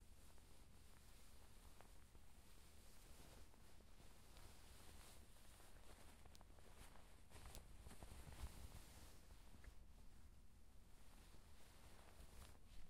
hand on sheet brush
This is the sound of a hand brushing on top of a sheet. It was recorded near a wall in a carpeted concrete room. It was recorded on a tascam DR-40.
Bed, Brush, Hand, Sheet